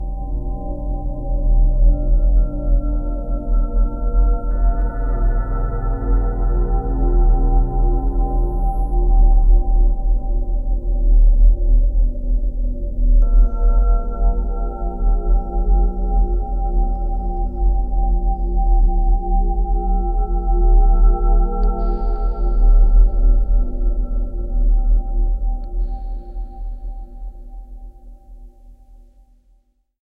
THE REAL VIRUS 06 - BELL DRONE - E1
Drone bell sound. Ambient landscape. All done on my Virus TI. Sequencing done within Cubase 5, audio editing within Wavelab 6.